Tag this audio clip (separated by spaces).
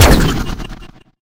high-tech video-game games photon-cannon